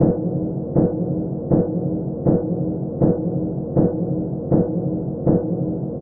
STM3 thumper 1
8 echoed hits. Mid tones. Not much bass. Sounds like something hitting a large boat under water.